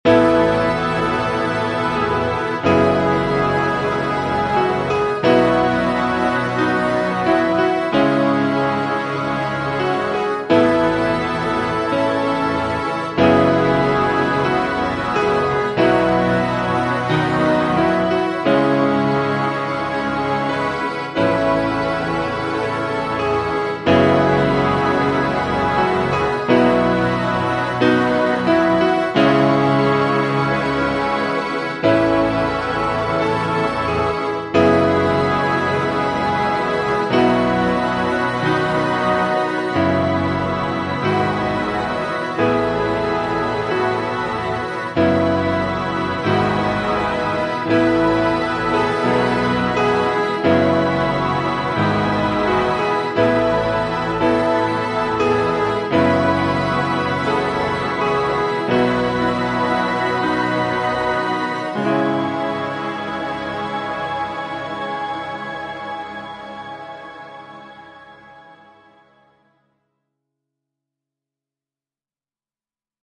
Made in the studio with Logic pro 10 Keys of Life was designed to be apart an epic video game/ movie. The feeling was meant to take you on a journey through an epic moment that was unforgettable.
Chords, strings, Keys, Harmonic, Piano